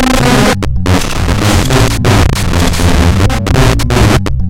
circuitbent Casio CTK-550 loop1
casio, circuit